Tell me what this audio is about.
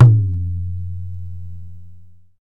Bass stroke ghe on a tabla